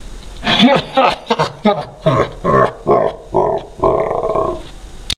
risa malevola
efecto vocal grabado en el pc camibiando el pitch
efecto, PC, vocal